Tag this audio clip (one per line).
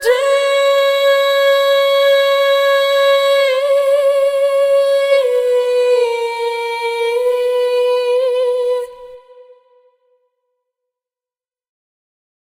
female
katy
effected
vocal
day
singing